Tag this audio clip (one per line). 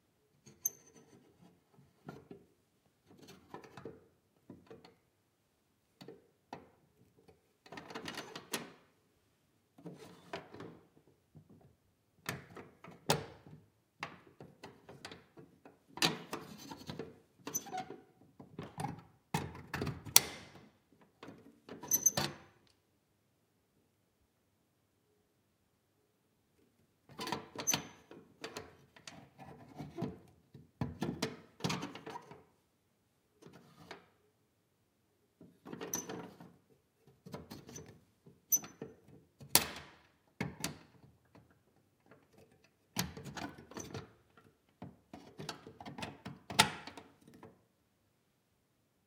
Lock
Metal
Scraping
Thalamus-Lab
Unlock